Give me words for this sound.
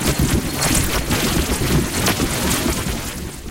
Screeches made with the Waldorf Micro Q's Random LFOs and Filter FM, Smoothed out with UAD's Fairchild/Neve plug-ins, warped in Ableton, run through a Helios69 Emulation and LN1176.